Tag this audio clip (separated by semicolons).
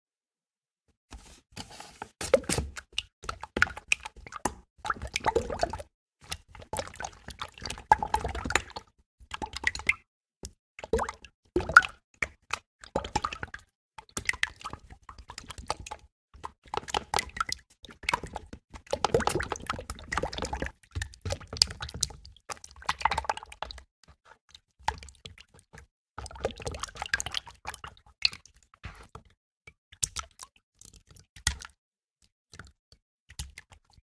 bubble; sticky